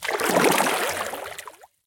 Water Paddle med 016
Part of a collection of sounds of paddle strokes in the water, a series ranging from soft to heavy.
Recorded with a Zoom h4 in Okanagan, BC.
field-recording, lake, splash, water